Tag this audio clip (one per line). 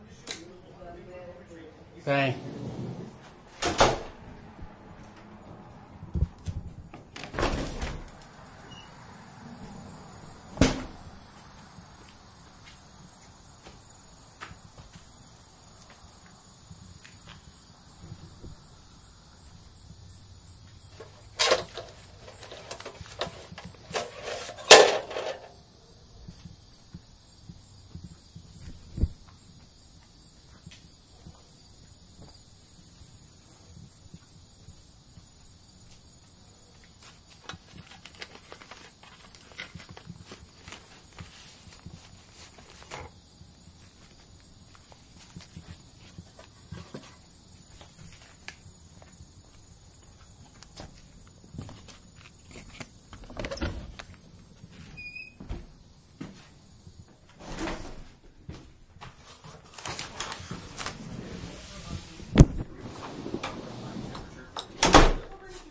close
clunk
crickets
door
female
footsteps
mail
mailbox
male
man
metal
newspaper
open
paper
porch
shoes
small-town
snail-mail
talk
tennis-shoes
traffic
tv
weather-stripping
woman
wooden-door